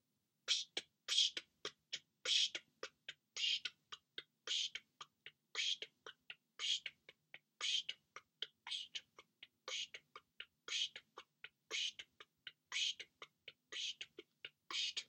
Comedy Chuggy Motor 1
A funny vocal motor effect.